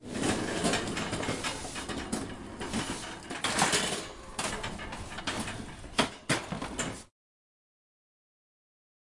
08 - shopping cart
Getting a cart from a row.
Recorded on Zoom H4n.
Close perspective, inside.